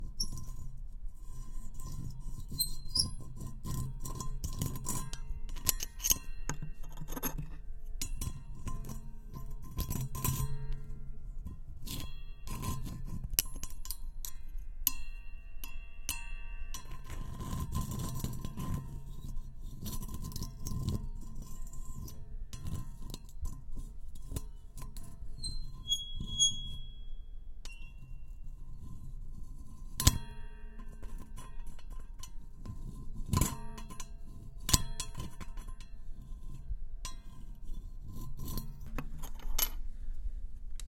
Glass scraping - misc
Bits of broken glass being scraped and cracked against various surfaces. Can be hard to listen to at times. Use in a scary movie or anywhere you need a disturbing, uneasy effect.
uneasy, horror, scrape, disturbing, scraping, crackling, creepy, glass, noise, crawling